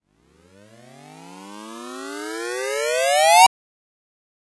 sine pitchbend filtersweep
part of drumkit, based on sine & noise